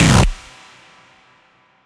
Experimental distorted sample. It hurts my ears.